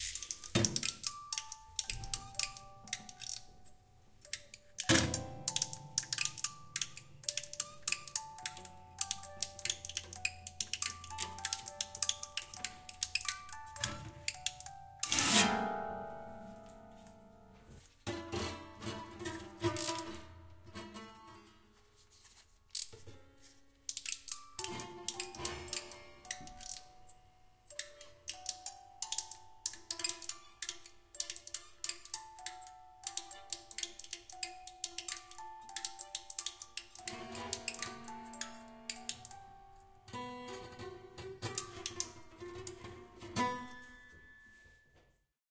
Recording of a crackling broken music box that someone sent to me for sounddesigning purposes. I recorded it through a pair of Neumann KLM 102, throwing it into a grand piano. The room was quite a bit reverberant.
broken, piano, musicbox, experimental, cracking, acoustic, music-box, dare-9